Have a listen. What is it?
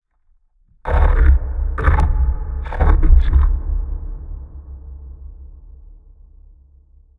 Reaper voice effect
Heavy editing of a fog horn recording vocoded with my voice to sound like a Reaper from the Mass Effect games. This one took me over an hour to master but I still think it needs work.
Reaper, Mass-effect, Robot